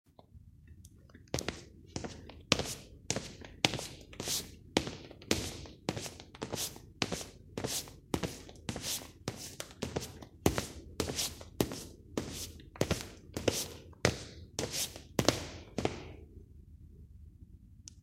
Footsteps - sneakers on concrete (walking)
Footsteps indoors on a concrete floor
concrete, indoors, walk, sneakers, walking, steps, Footsteps